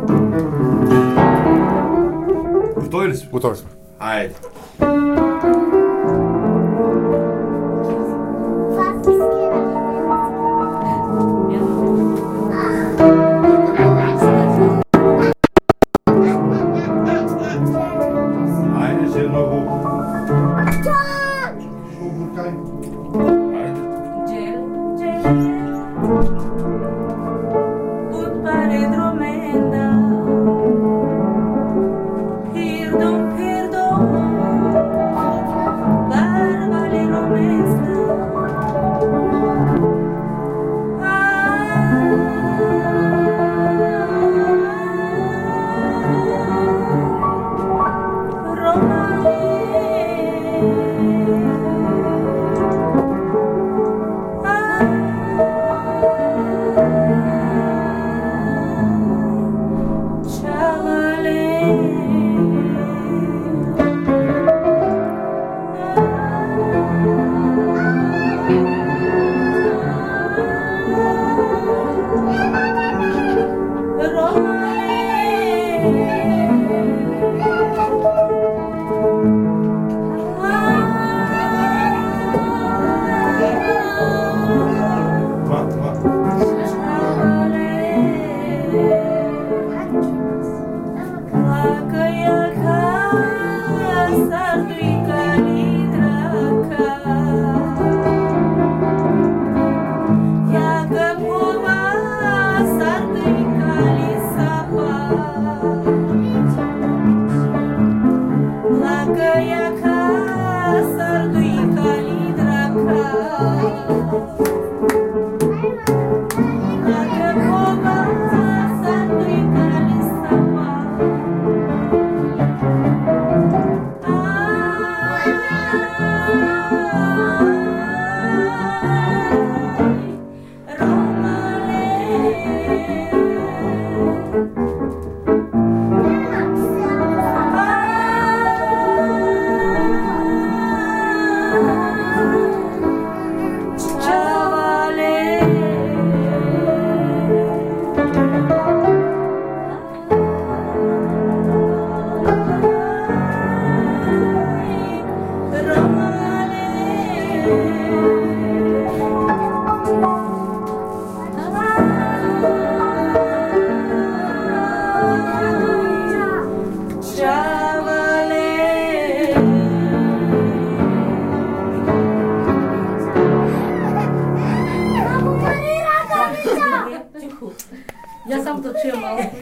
Nadezhda district, Sliven, Bulgaria
This is an important Roma "Ghetto" in Bulgaria.